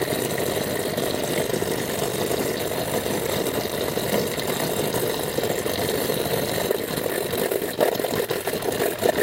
A pump which is powered but out of water attempts to run, making a choking electrical sound
chug, damaged, electrical, fountain